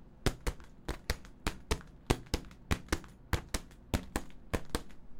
Steps on grass.